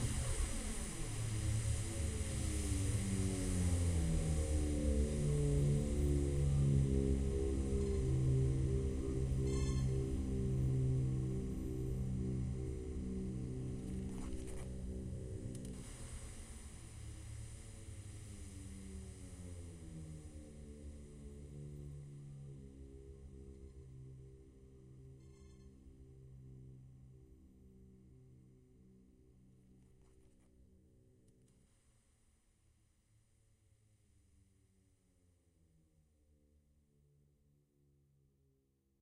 car out
quiet bsounds for lowercase minimalism
quiet, lowercase